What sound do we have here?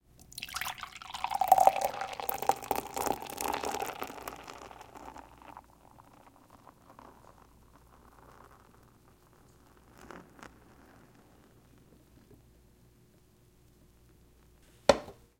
Can Beer Pour Liquid on glass mug setting empty can on table after edlarez
Pouring canned beer Liquid on to glass mug, setting empty can of beer to the side at wood counter table after pour. edlarez vsnr
Beer, Caned, fill, foley, glass, liquid, pour, pouring